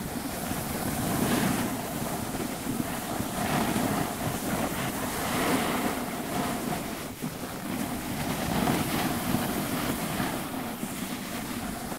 Snowboard Slide Loop Mono 01
Snowboard - Loop.
Other Snowboard loops:
Gear: Tascam DR-05.
snow, gliding, sliding, slide, loop, winter, ice, winter-sport, glide, field-recording, snowboard